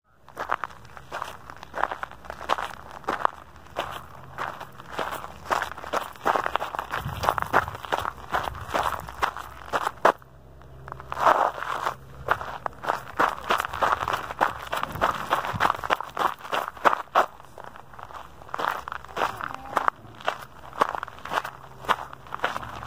tlf-walking running gravel 01
Running on gravel
outdoors, trail, ambience, bird, gravel, singing, running, birds, birdsong, nature, field-recording